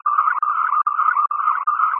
Created with coagula from original and manipulated bmp files.
image, space, spiral, synth